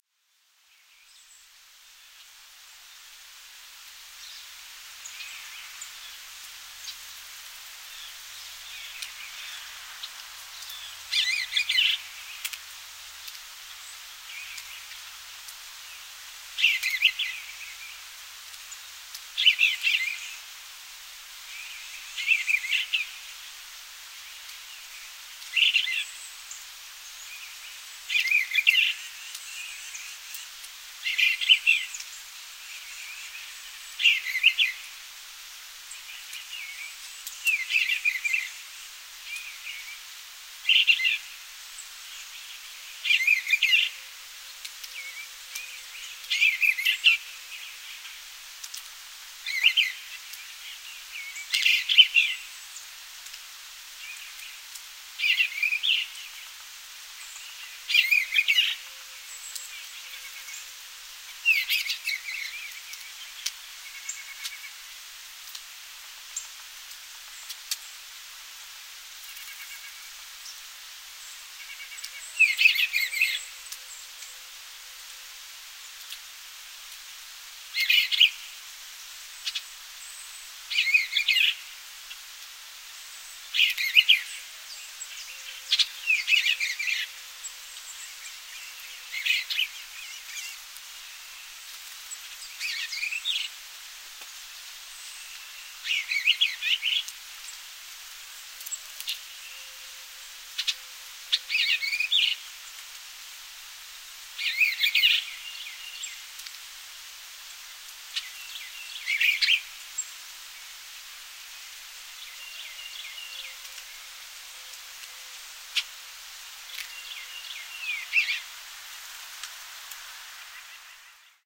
Gallant Bluebirds
Three blue birds in a small tree about 30 ft from my window this morning.
bird, birder, birding, birds, birdsong, blue, Bluebird, bluebirds, field-recording, forest, morning, nature